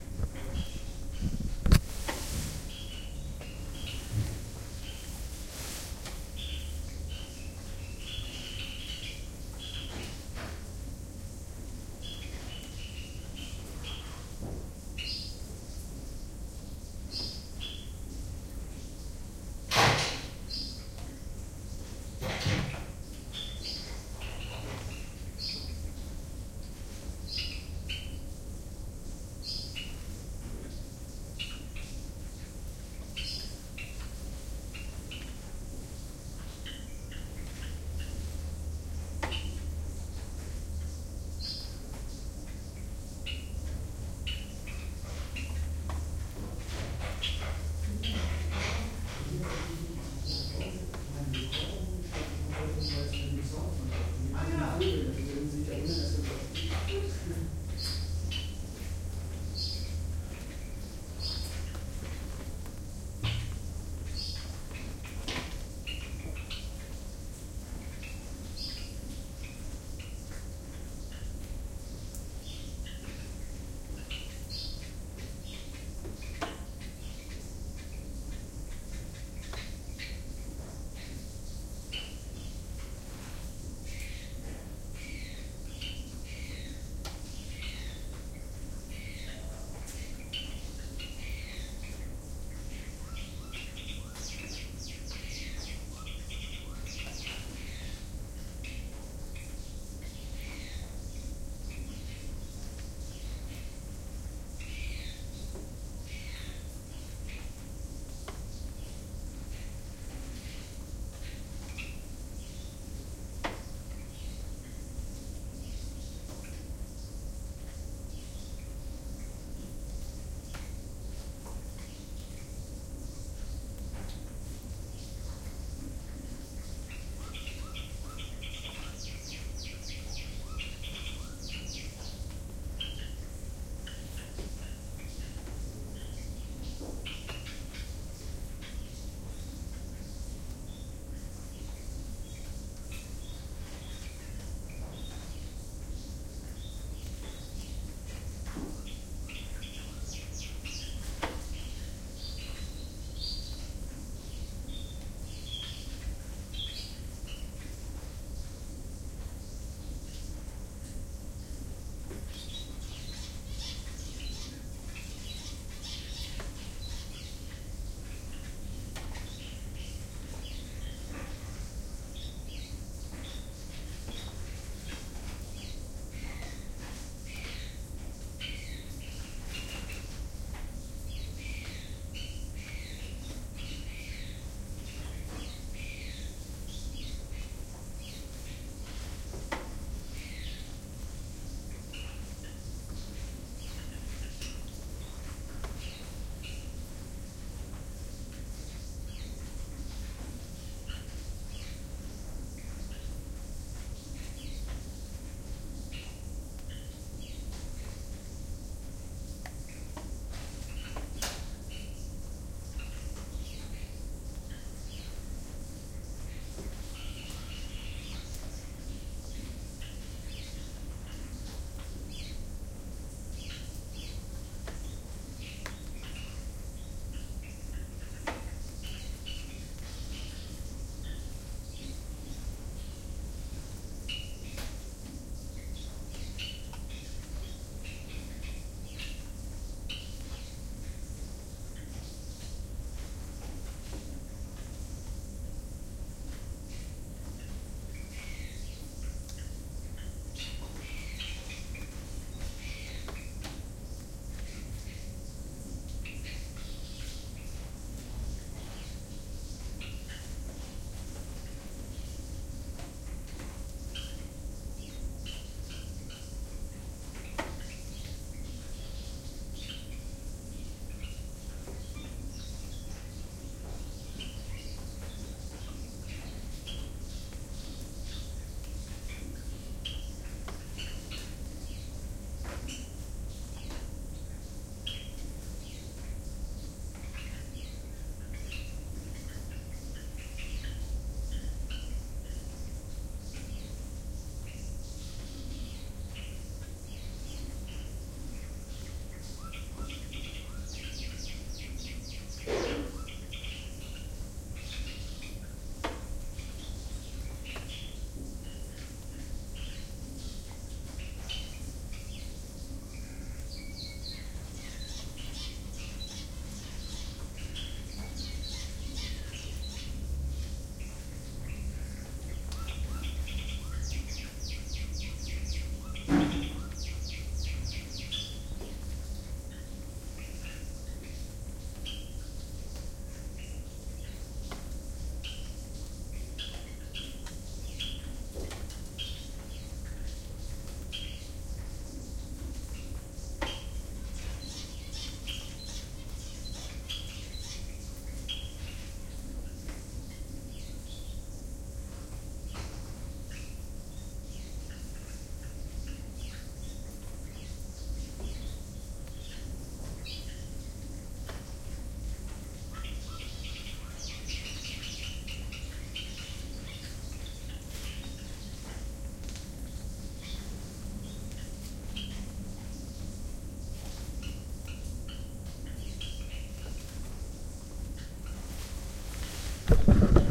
SR000R orchid house
This recording was done in one of the glasshouses of the "Berggarten" in Hanover / Germany.
Apart
from plenty of Orchids and other plants there are different varieties
of finches flying around in this glasshouse: the reason for this
recording.
I placed the recorder in the middle and on this morning there was just one gardener working there.
This recording was done with a Zoom H2 recorder.
The
most unusual feature of the H2 is its triple quadruple mic capsule,
which enables various types of surround recordings, including a matrixed format that stores 360° information into four tracks for later extraction into 5.1.
This is the rear microphone track.
With a tool it is possible to convert the H2 quad recordings into six channels, according to 5.1 SMPTE/ITU standard.
Here is a link:
Sorry about the noise of me switching the recorder on and off, but I didn´t wanted to cut this track.
zoom, field-recording, h2, birds